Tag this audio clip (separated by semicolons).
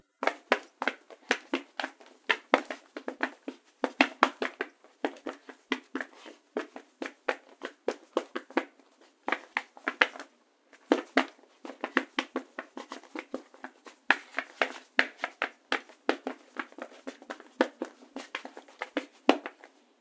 Action; Battle; Fight; Foley; Shoes; Shuffle; War